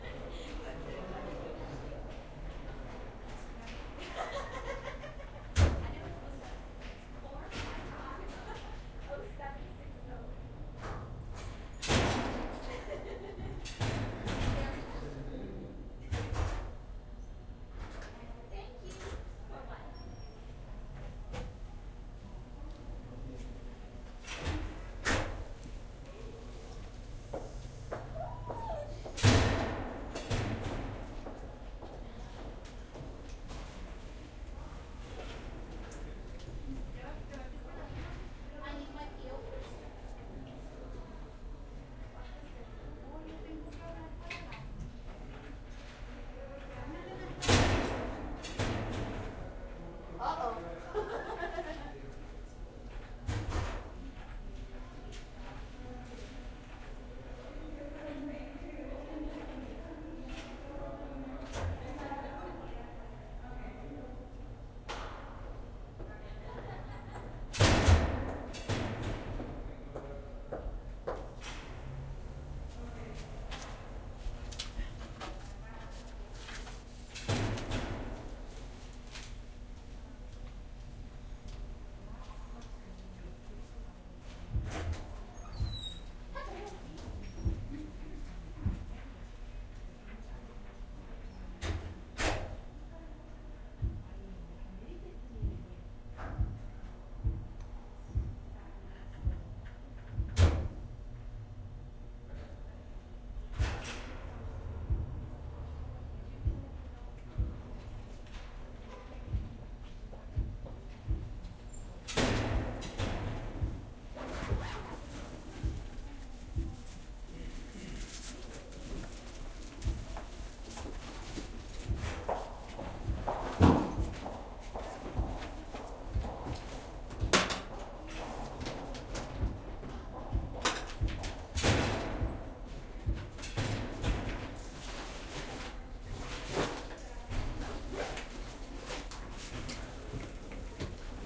office quiet end of day doors close steps voices echo english and spanish Calexico, USA
spanish, voices, doors, Calexico, office, steps, close, echo, quiet, USA, english